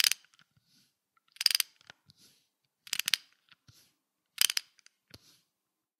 Ratchet - Vlc - Tighten 4
VLC ratchet tightened four times.
nut, 80bpm